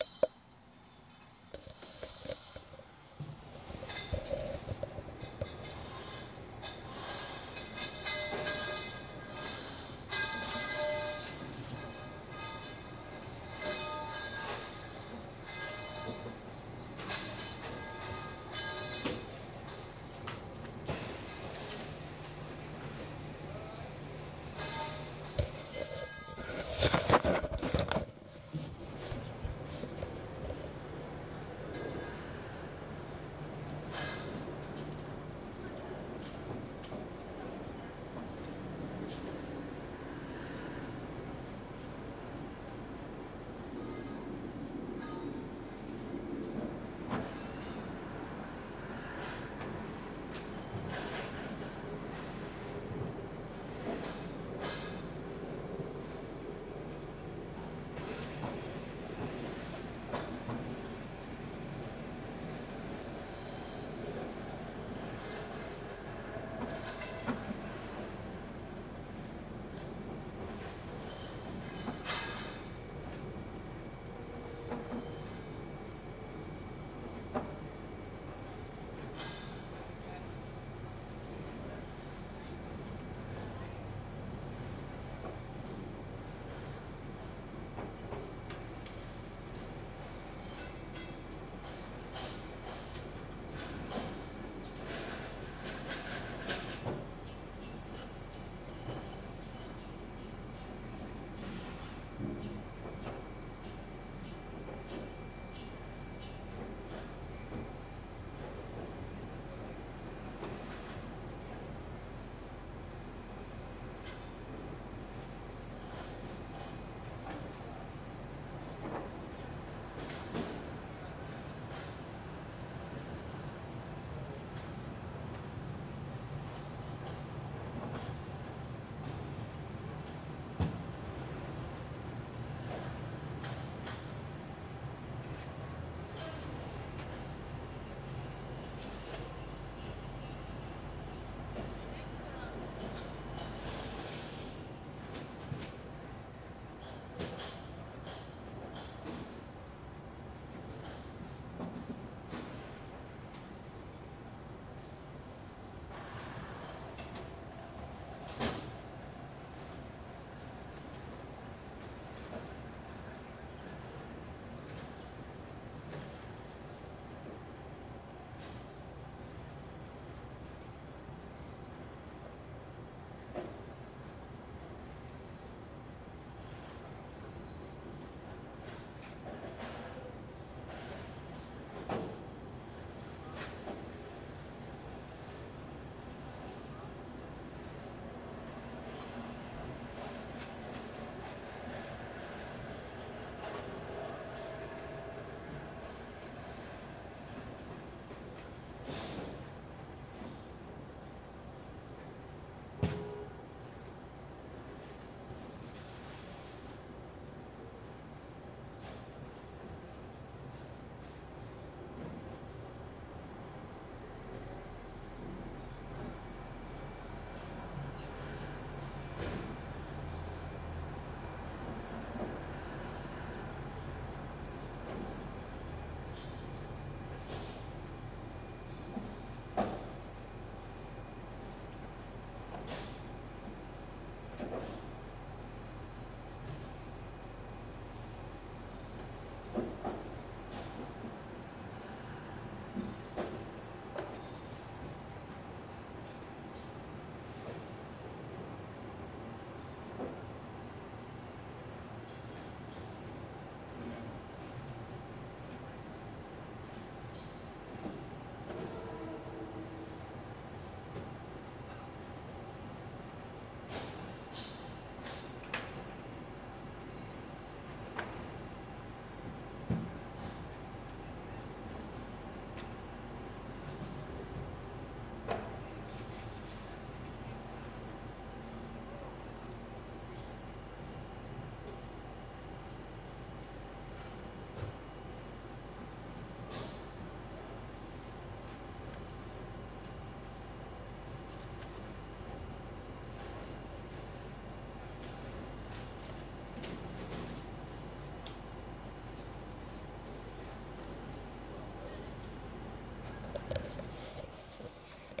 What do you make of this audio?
A farmers market. people work without speaking. the clank of the tent poles is what drew me to the sound.